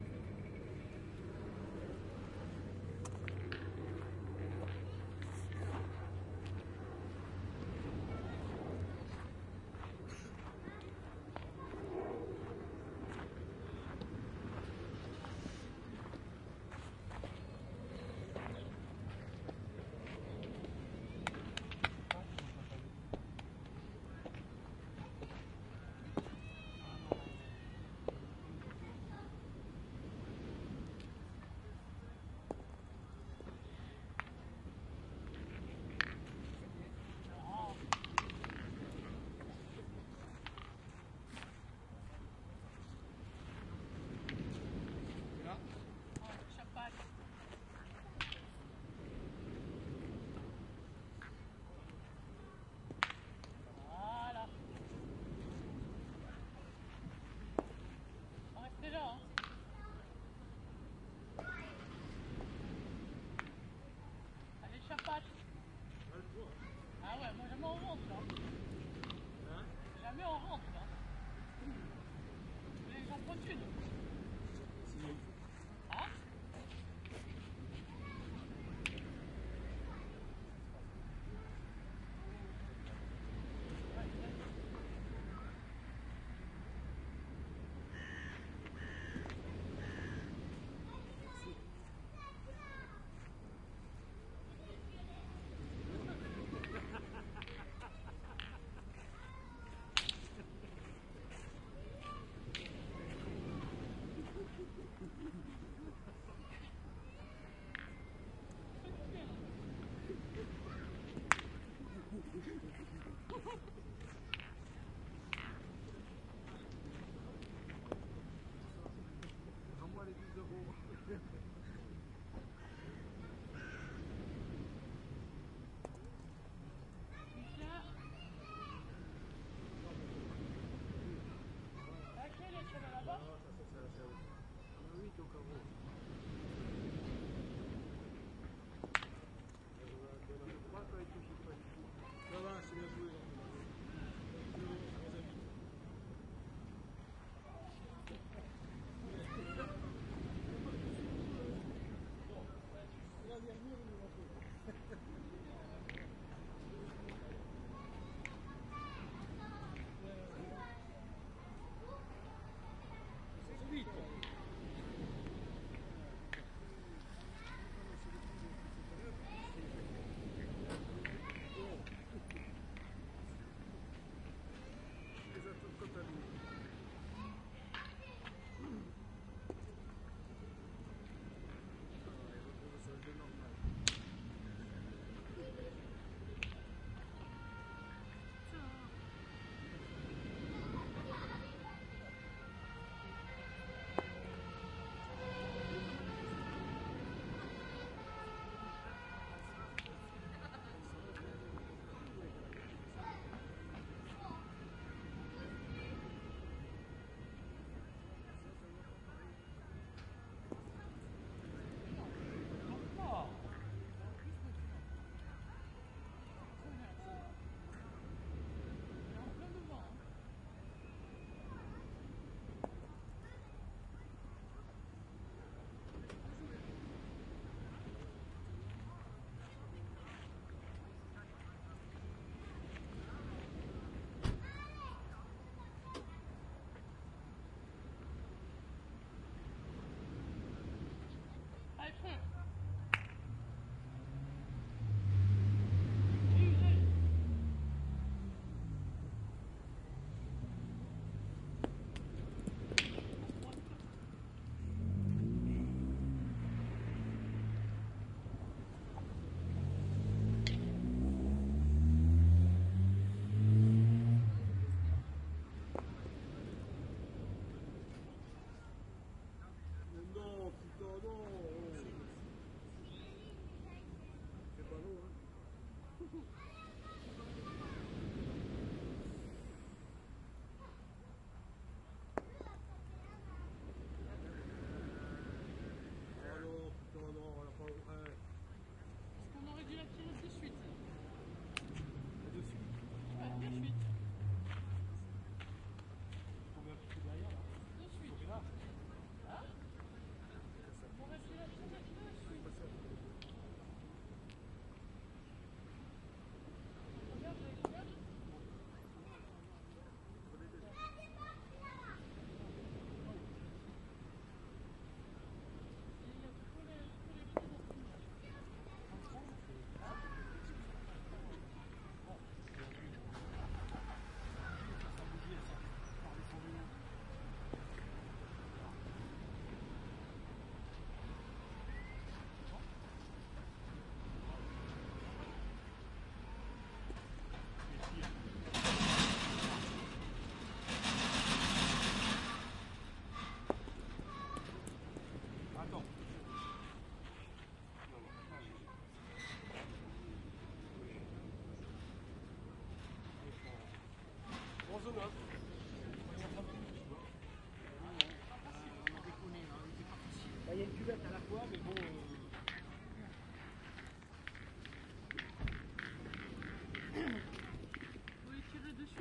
porticcio boules
A group of men playing boules near the sea. The balls hitting each other can be heard, also people, planes and cars.
Recorded with The Sound Professional binaural mics into Zoom H4.
click, field-recording, geotagged, plane, men, life, social, ambient